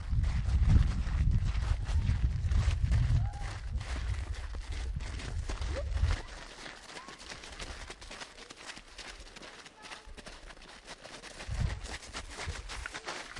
Here is sounds that pupils have recorded at school.